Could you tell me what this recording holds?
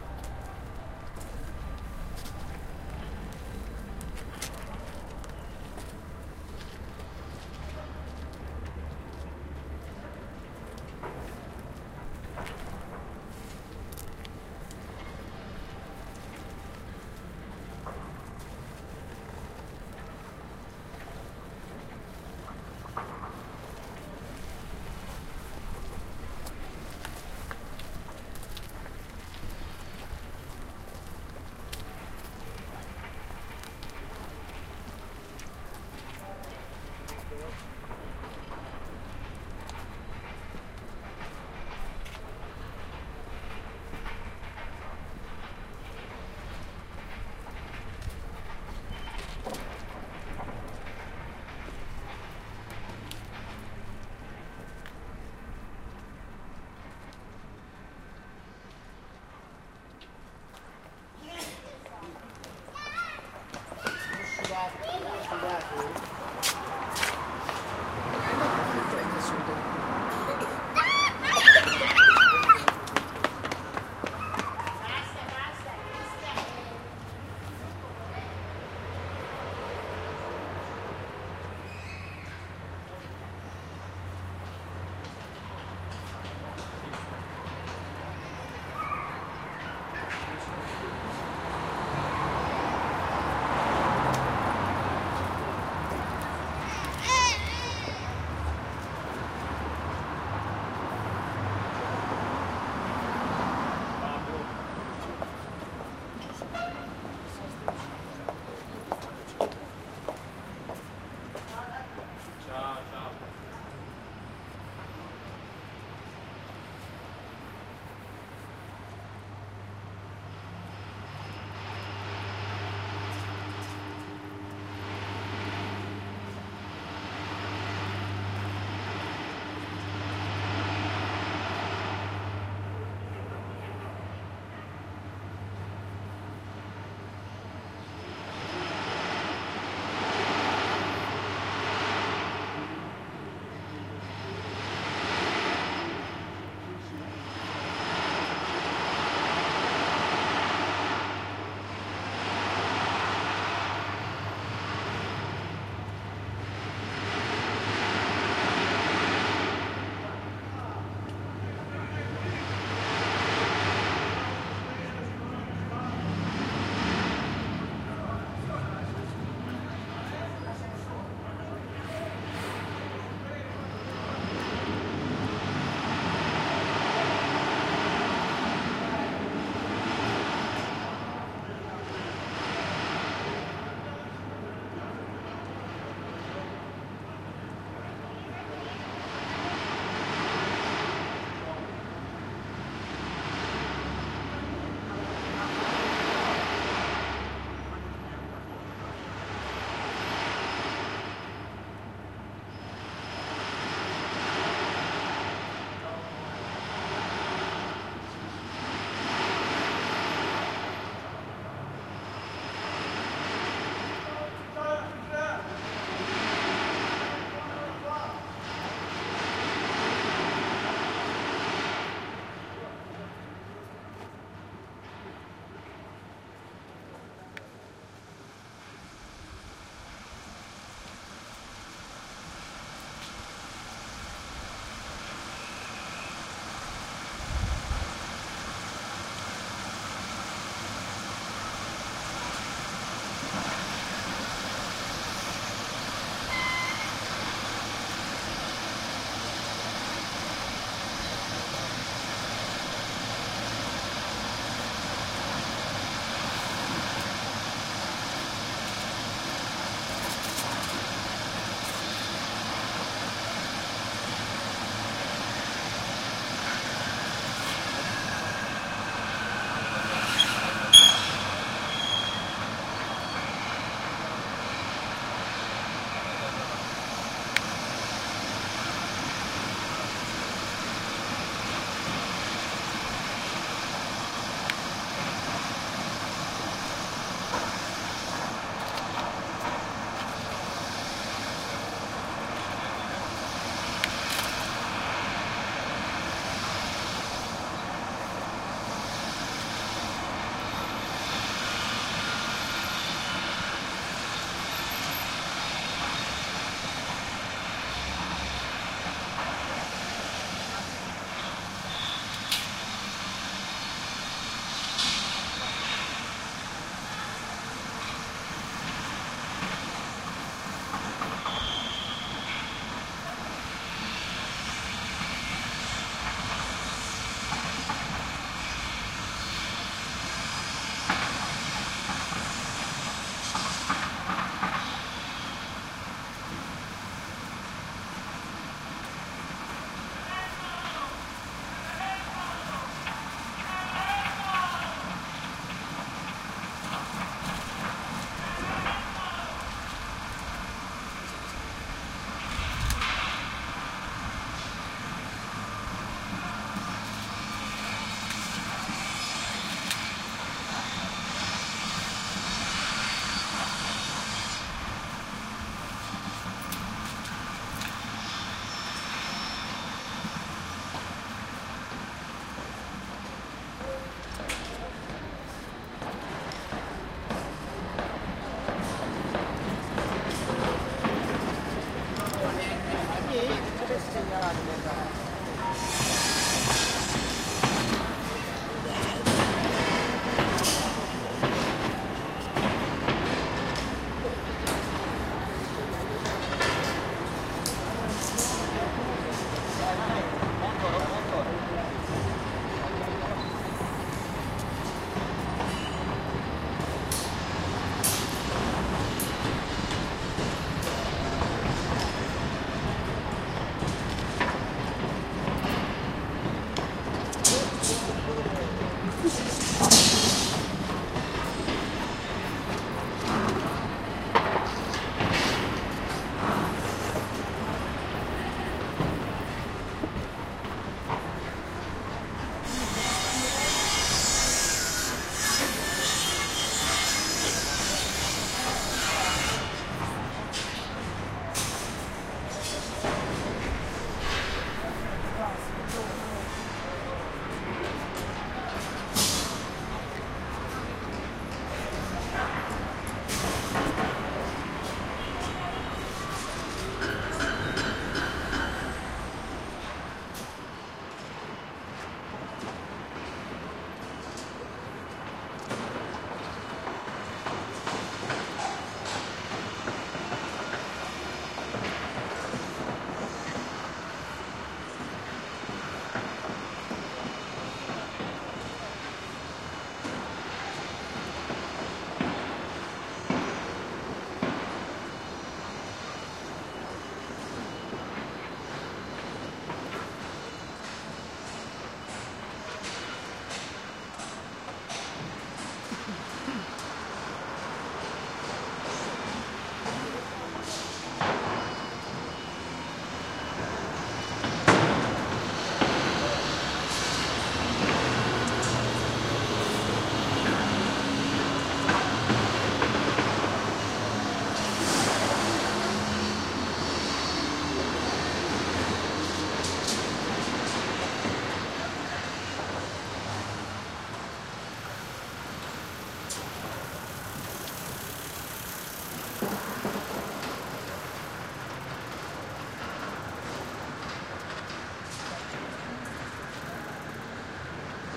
federico cortesi
Soundwalk. part of the field recording workshop "Movimenti di immagini acustiche". Milan - October 29-30 2010 - O'.
Participants have been encouraged to pay attention to the huge acoustic changes in the environment of the Milan neighborhood Isola. Due to the project "Città della moda" the old Garibaldi-Repubblica area in Milan has turned into a huge bulding site.